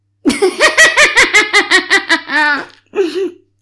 witchy laugh 5
A witchy laugh.
woman, female, witch, laugh, granny, witchy, girl, grandma